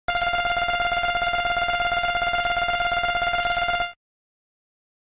Text Scroll F4 1 0 255
A high-pitched F pluck in the pulse channel of Famitracker played repeatedly to show text scrolling
scroll, dialogue, 8-bit, dialog, harsh, retro, high, text